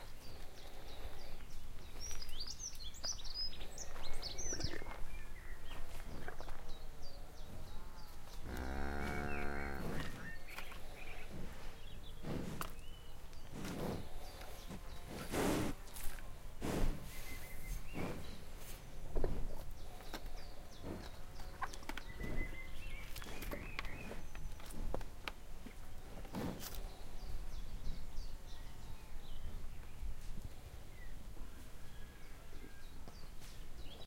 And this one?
Cows lowing and snorting
Not moos as such this time, but there is a low... ermm... "low" and lots of snorting and puffing going on from this group of cows gathered at the gate to the field.
cows, lowing, mooing, farm-animals, cattle, moo, countryside, cow, farm